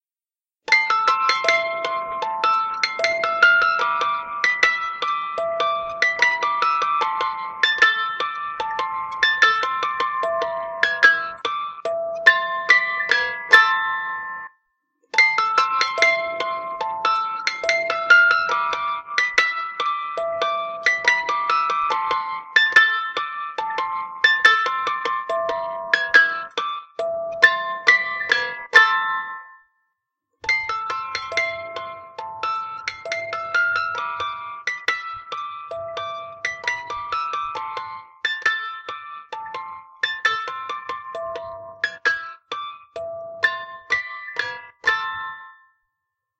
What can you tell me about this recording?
An old music box playing 3 times.
Antique, Bell, Bells, Box, Dial, Music, Ring